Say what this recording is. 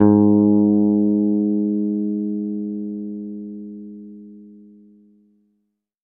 Second octave note.